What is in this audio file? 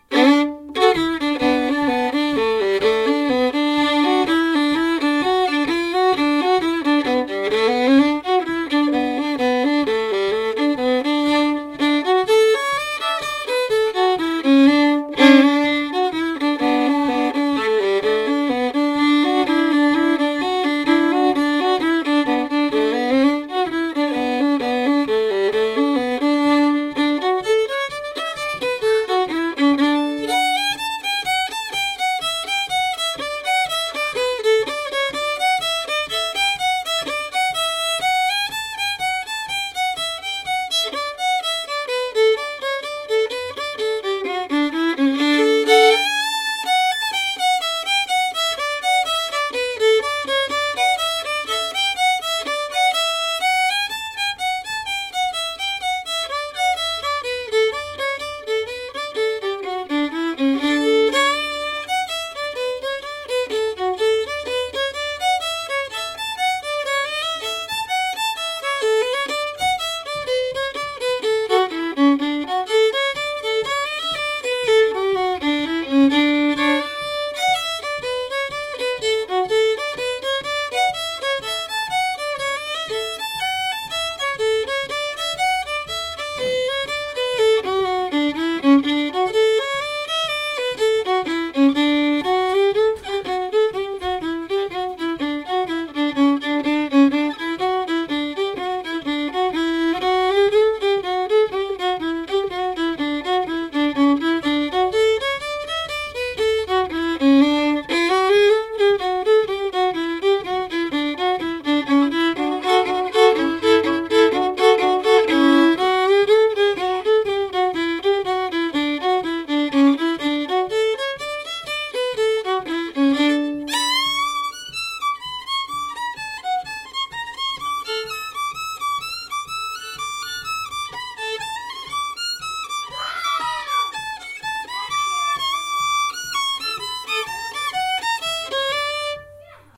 I was at my fiddle lesson with my Zoom H4n, so I thought that I would record my fiddle teacher playing the folk song Arkansas Traveler. My fiddle teacher has played with Alison Kraus before. He is a master fiddler.
folk-music, arkansas, folk, country, solo, arkansas-traveler, traveler, melodic, violin, solo-violin, music, fiddle